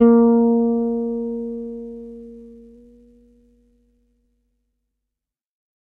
Third octave note.
bass, multisample